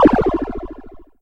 Retro, underwater explosion!
This sound can for example be triggered when a target is destroyed - you name it!
If you enjoyed the sound, please STAR, COMMENT, SPREAD THE WORD!🗣 It really helps!
blow, blow-up, bomb, boom, death, demolish, destroy, destruct, detonate, explosion, game, retro, undersea, underwater, up